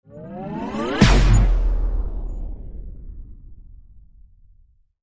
The spaceship's small ray gun fires.
laser, spaceship, small